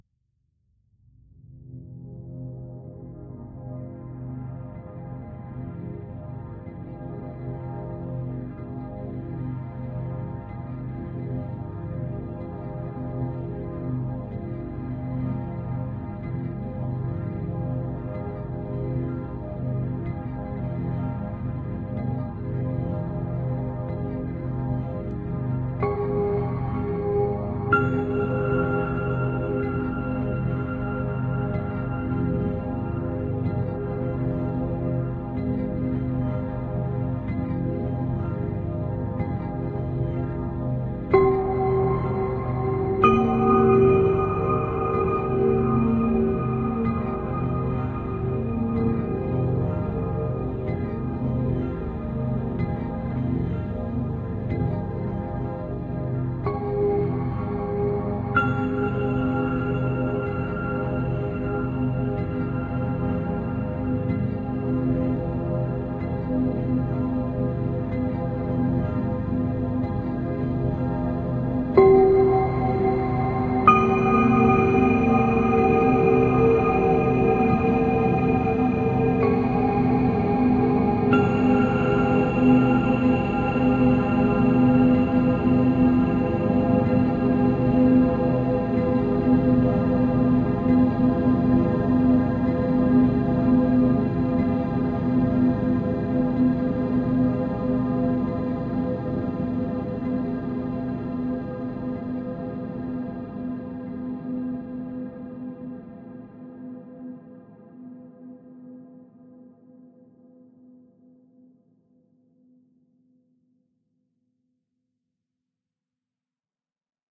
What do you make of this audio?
Inspired by Erokia's tutorial here:
I did not use Paul's Extreme Sound Stretch, but rather took an original recording of a Glockenspiel (with a lot of reverb), and set the playback rate to around 20% its original value. This stretching was combined with use of the following plugins:
Valhalla Space Modulator
NI Phasis
TDR Nova GE
IM Wider
TAL Reverb 4
Soundspot Velo2 Limiter
ambience, ambient, atmosphere, dark, deep, drone, effect, electronic, experimental, fx, pad, processed, sci-fi, sound-design, soundscape, texture